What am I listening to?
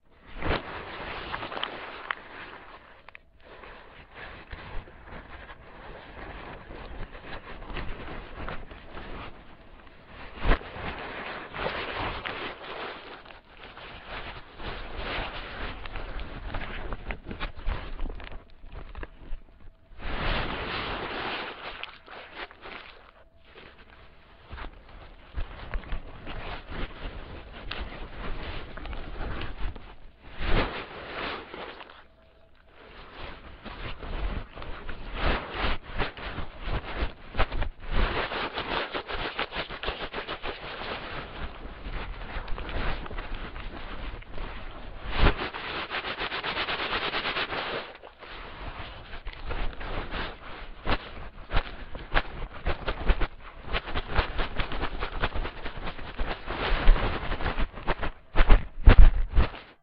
wax paper slowed and turnt up
This is the sounds of wax paper crinkling that I recorded before slowed down and with increased gain.
logic-pro-9, MTC500-M002-s14, effect, noise, wax-paper, experiment, edit, sound